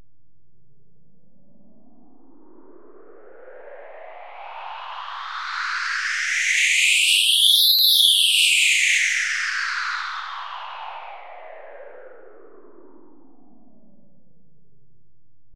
A simple square pling, processed through Guitar Rig 4. Copied, and pasted reversed in Edison. Kinda magic sound.